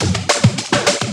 jungleflange loop
Experimenting with beats in analog x's scratch instead of vocal and instrument samples this time. Flanged with a different setting in Cool Edit 96.